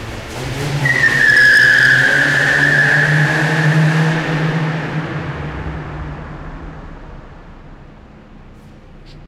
Car - Start fast in underground parking
A car starts fast in an underground parking - tires screeching - interior recording - Mono.
interior, start, tire, car